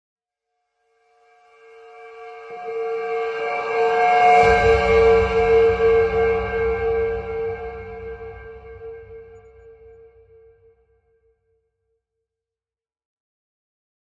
End Or Beginning Processed Gong
The last one for this year.
Recording of a small gong.
Processed in Steinberg Cubase 9.
No layering.
Here's the source material:
Have fun!